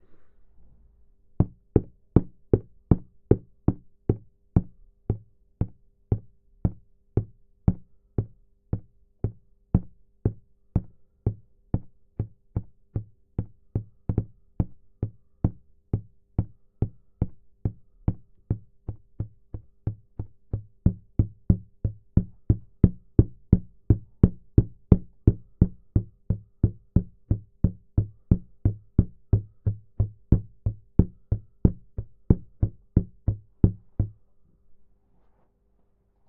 I recorded this , by knocking an object against a big Tupperware so it create more of a hollow spacious sound. The footsteps are very clear therefore these footsteps are for foley for someone walking inside a building of any sort, however the person would be walking on a wooden staircase or floor, because the sound is quite deep and hollow as if someone was walking across a wooden floor.
footsteps, Footsteps-Walking